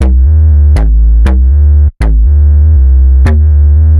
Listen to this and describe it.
DeepBassloop3 LC 120bpm
Electronic Bass loop